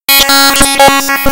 Raw data created in Audacity from a picture of Super Mario!
raw, audacity, data, beep